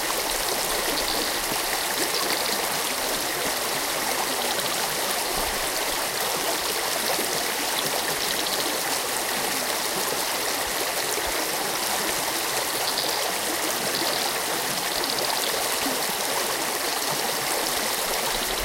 Recording of Serpentine Falls in Perth, WA, with frogs in the background.